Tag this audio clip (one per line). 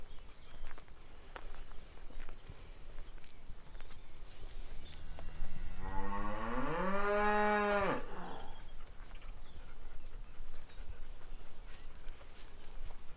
cattle
countryside
cow
cows
farm
farm-animals
lowing
moo
mooing